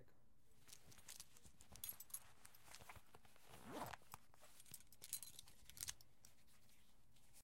Putting Belt On
Putting a belt on waist.
putting, belt, waist